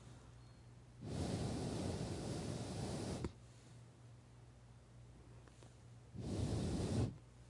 Slightly harder human blowing. Human breath blowing air.